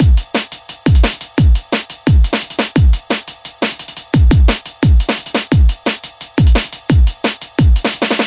Dnb Loop
--Made with Ableton Live--
Drum and bass loop. Might be a little better than my last one.
Thanks for Reading!
174bpm, dnb, Drum, Drum-And-Bass, Drums, Fast